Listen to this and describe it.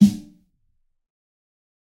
fat snare of god 012
This is a realistic snare I've made mixing various sounds. This time it sounds fatter